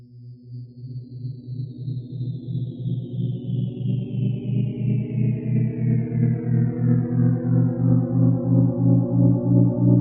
sonido despertador generado